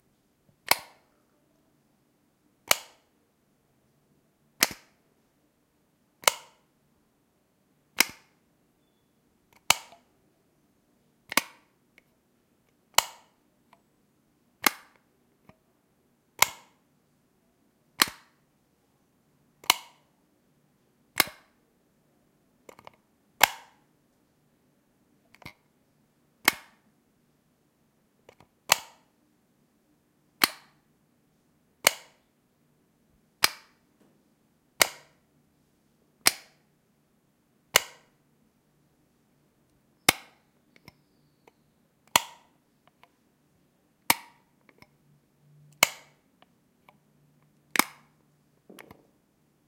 light switch plastic bathroom on off button push clicks1
button, push, light, bathroom, switch, clicks, plastic, off